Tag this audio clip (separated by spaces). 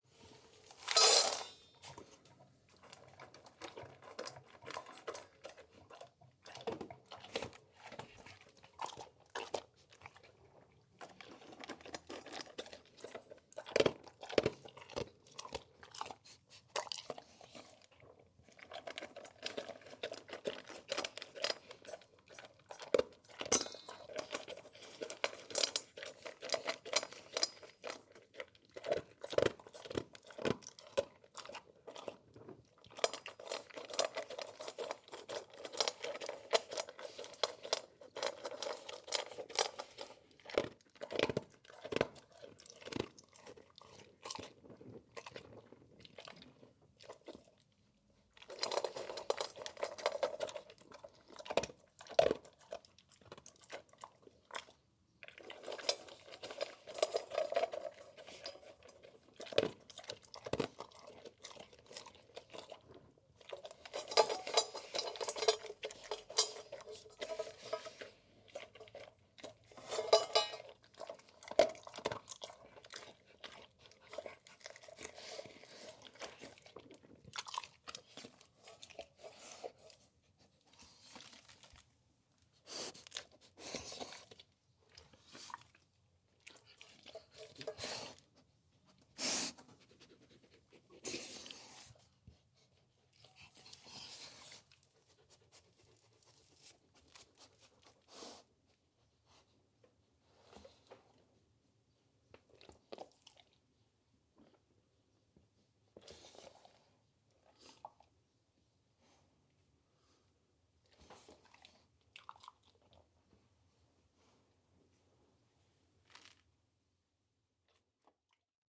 ANIMALS FIELD-RECORDING